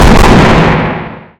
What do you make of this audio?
description for this one is going to be small, this was an inspired replication of an old sound effect using metal tones and aggressive distortion use and editing.
metallic, explosion, loud, fire